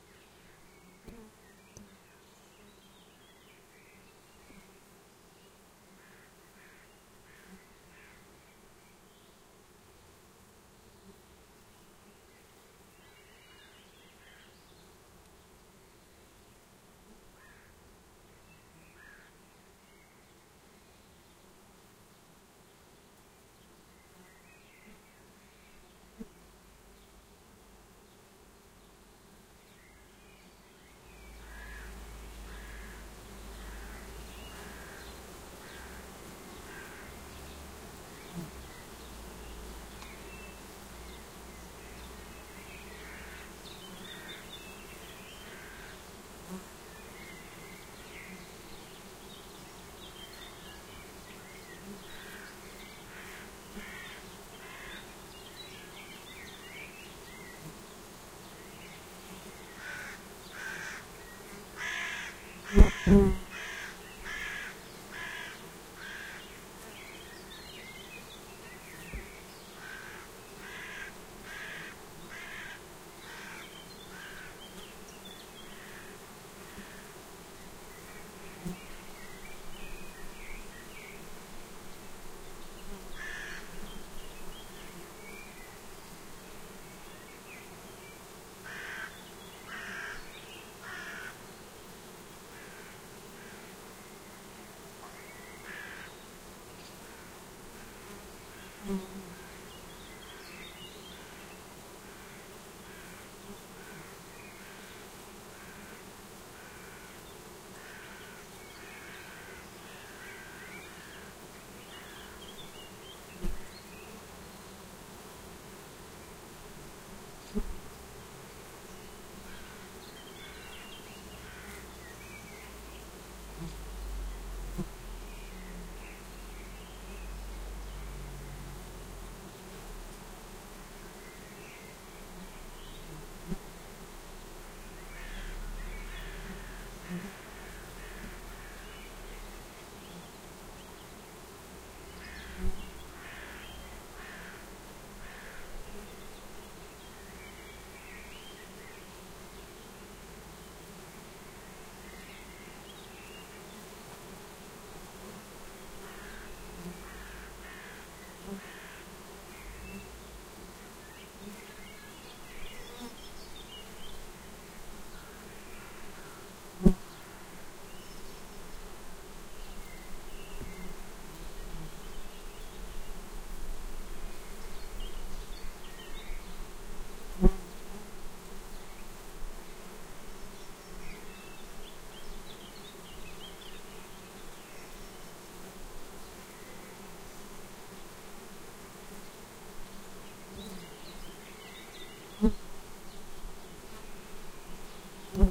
Bee Hive 4
Domestic Bee Hive recorded with 2 Rode NT-5 microphones.